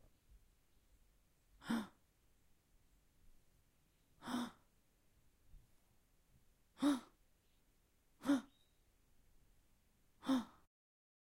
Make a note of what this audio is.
Gasp (female voice)
A female voice gasping multiple times. Recorded using a Zoom H6 with an XY capsule.